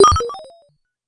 Short modulated oscillations. A computer processing unknown operations.Created with a simple Nord Modular patch.
sound-design, digital, synthesis, modulation, blip, synth, modular, beep, bleep